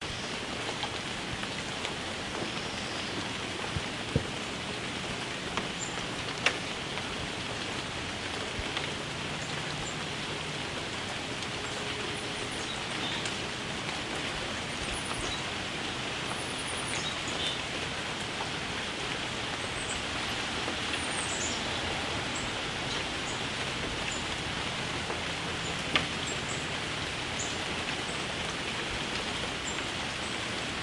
Steady Rainstorm
This is a recording of a steady rainfall. Can be looped and used for background noise/ambiance. Recorded with a RODE VideoMic and Olympus Digital Voice Recorder :)
raindrops, rainstorm, relaxing, soundscape, storm, tranquil